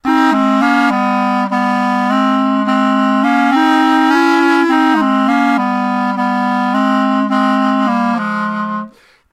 Gypsy 2 Mix
From a recording I'm demoing at the moment.2 clarinet parts mixed together. Part of a set.Recorded in Live with Snowball Mic.
group, melody, clarinet